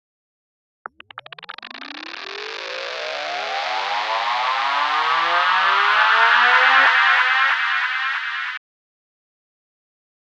R-delayed 2bar
fx, riser, sound-effect